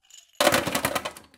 Ice Cubes Glass Drop Sink 01
Ice cubes being dropped from a glass into a kitchen sink